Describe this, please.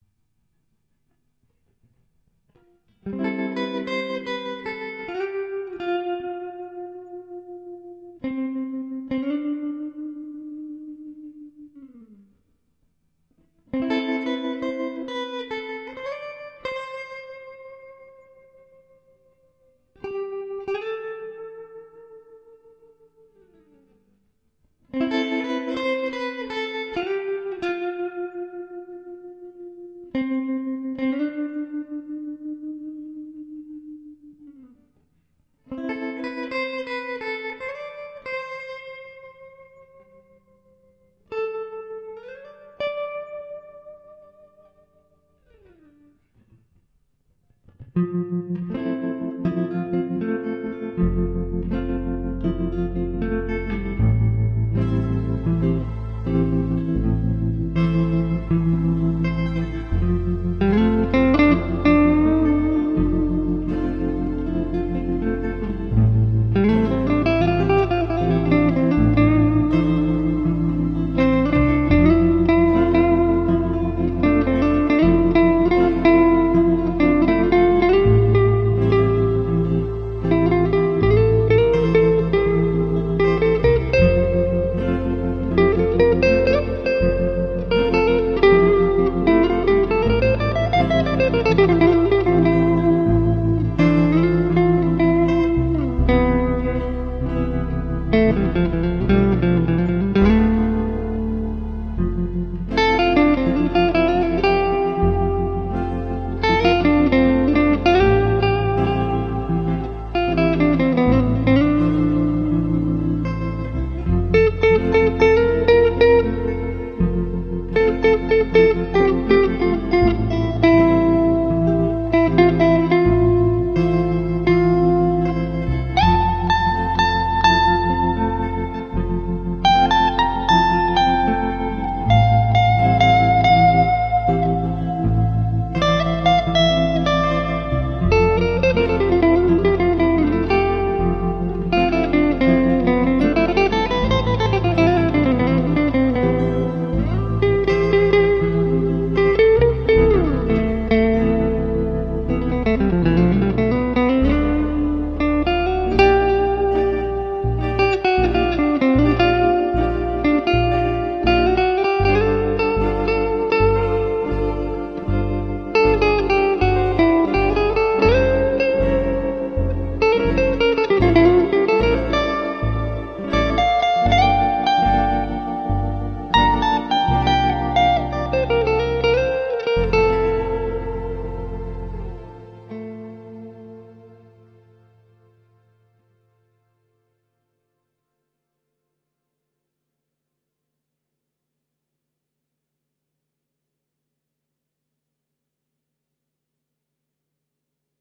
Sweet melodical guitar tune
This is simple instrumental song, where i played on my favorite old guitar Musima Record 17.
atmosphere, beautiful, beautty, electric-guitar, experimetal, guitar-recording, guitat, improvisation, instrumetal, melodical, music, musima, slow, song, sweet